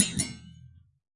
metallic effects using a bench vise fixed sawblade and some tools to hit, bend, manipulate.